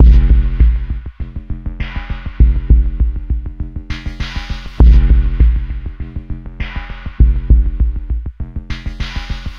a custom drum beat programned long time ago, still sounds fresh, loud and quite useful in many ways.